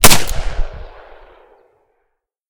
Firing Singleshot Rifle 2
Field recording of a rifle # 4.
rifle, firing, shooting, Firearm, gun, shot, FX, weapon